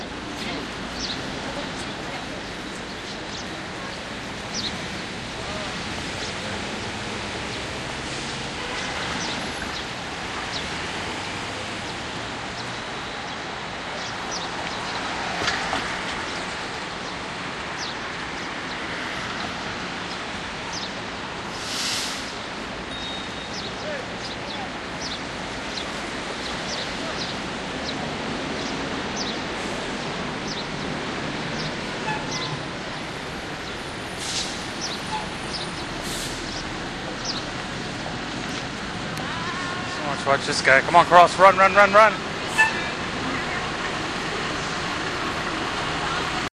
nyc 5thave west14thst runrun
Walking on 5th Avenue and West 14th Street in New York City recorded with DS-40 and edited in Wavosaur.
urban, new-york-city, field-recording, ambiance